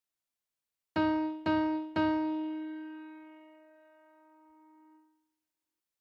D Sharp Piano